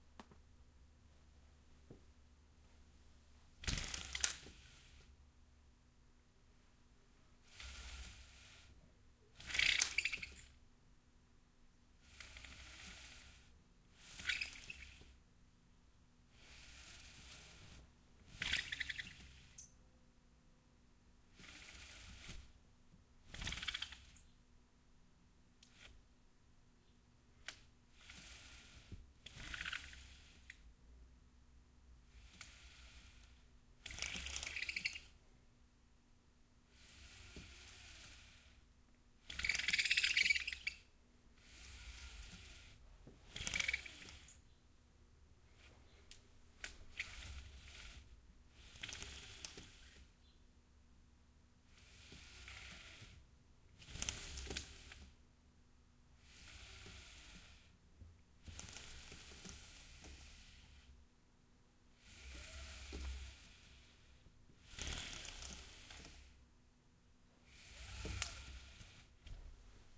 Pulling the cord on my blinds to shuffle them open and closed. Done to accompany an acquaintance's 3D animation thesis. Multiple iterations and various speeds are included, so find one you like and isolate it.